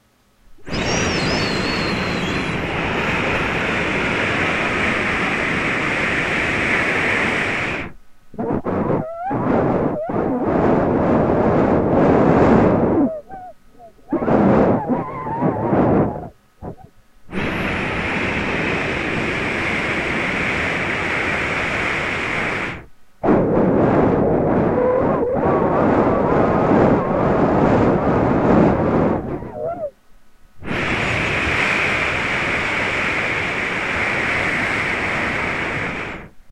Yeah, I stuck a cheap microphone up my nose, what of it?
nose, breathing